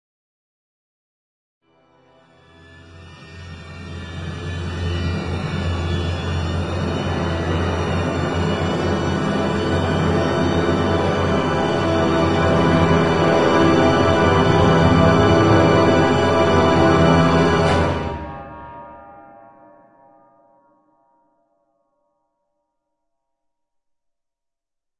Robo Walk 05D
A giant robot taking a single step described using various instruments in a crescendo fashion.
Orchestral, Suspense, Cluster